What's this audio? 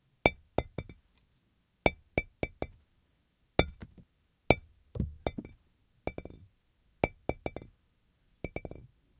bouncy ball on tile
dropping a bouncy ball on a tile
clink, dink, bouncy-ball, tink, tile, ball, ching, bounce